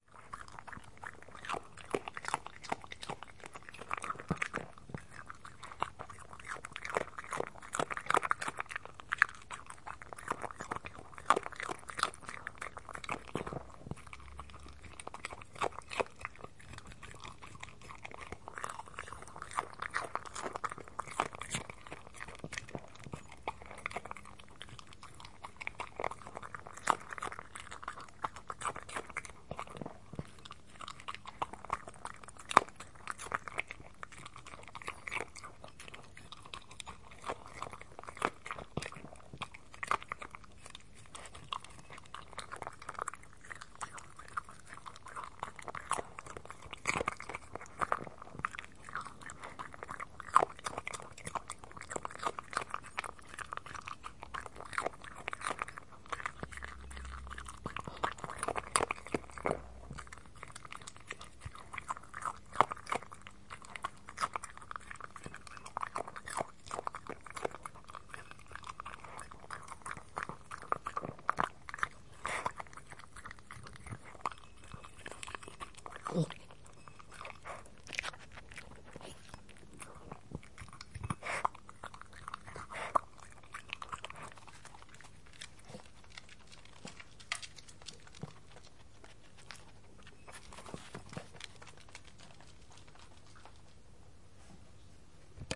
small dog eats pieces of cucumber and carrot
As I heared our doog eating the crunchy vegetables, I quick got my Zoom H4n to record it. The maybe some noise of a fly or traffic in the background, but the internal mics were very close to the food-bowl. I had some issue when I came very close as the dog tried to eat as fast as possible, so i prefered to lay the recorder on the ground and kept away with my arm so the eating could be more relaxed. But the dog gobbled anyway in a fast way. Enjoy the soundscape. Maybe use it for ASMR ;-)